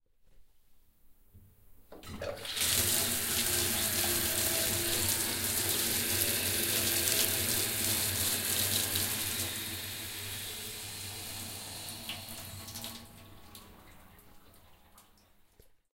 Bath Tap
running, Bath, drip, dripping, water, tap, drain, sink, splash